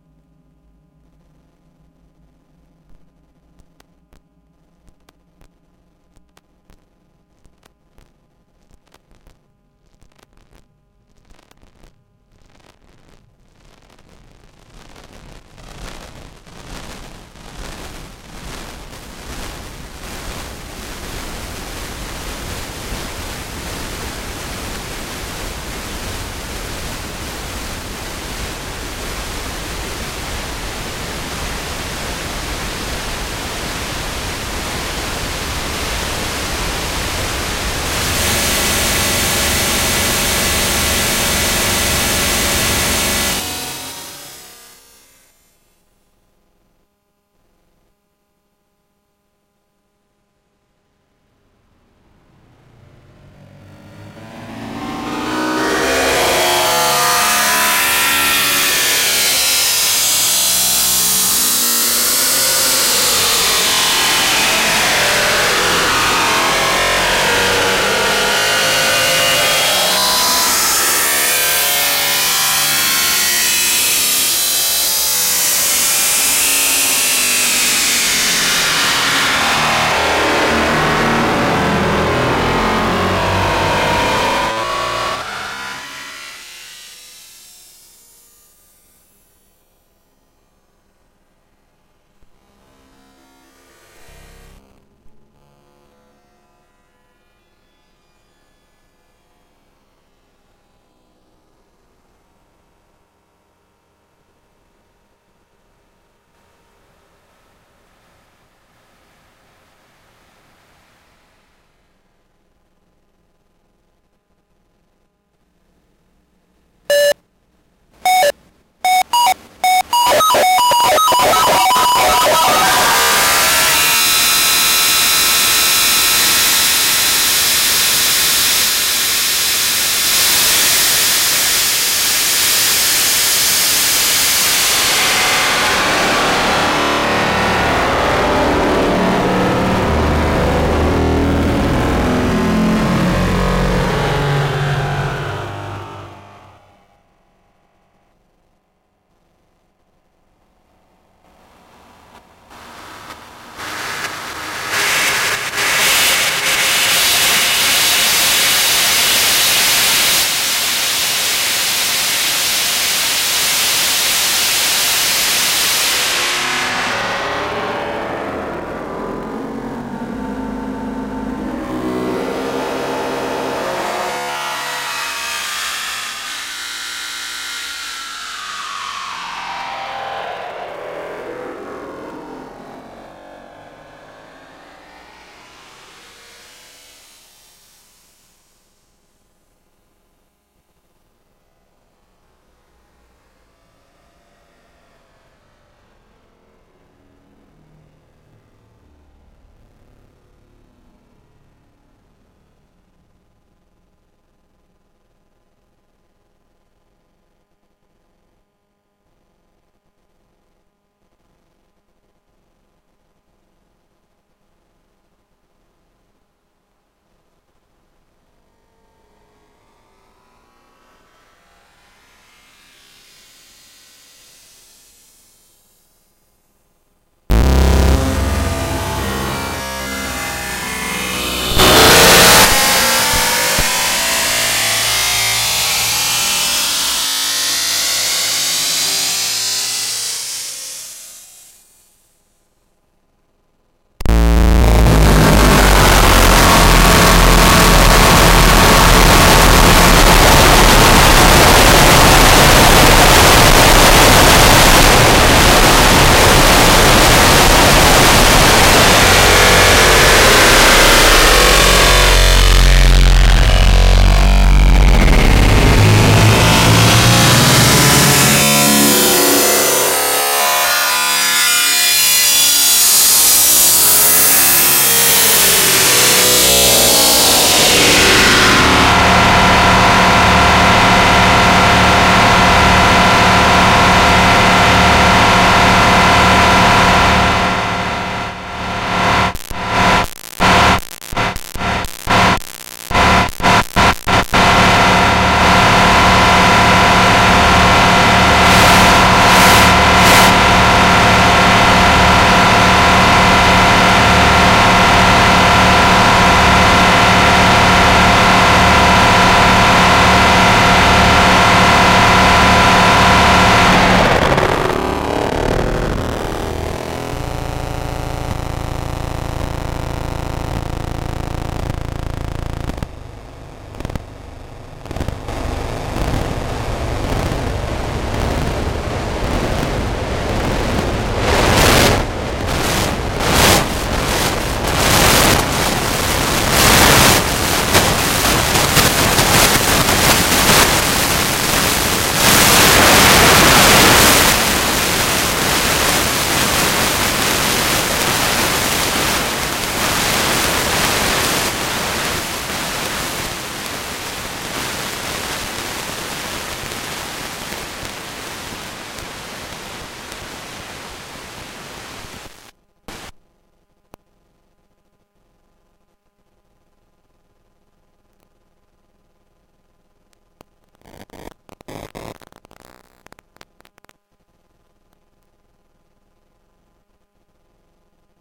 autocrap4feedback
Recorded from laptop running autocrap to PC, internal sound card noise and electromagnetic phone transducer stuck to DC converter. Added a feedback loop on laptop split with transducer on DC converter.
buffer, feedback, loop, noise, sound, synth